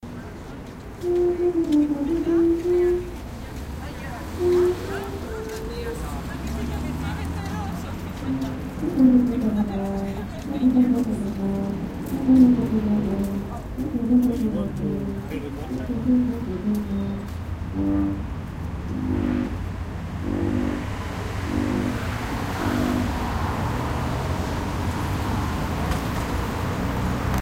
Ambiance in the streets of Copenhagen, with cars passing. A tuba player practises somewhere
city, street, voice, field-recording, ambiance, tuba, streetnoise